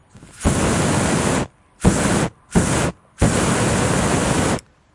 13GBernardD plamenomet
Plamenomet z voňavky